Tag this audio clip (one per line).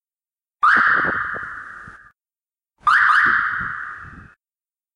car
sound
Lock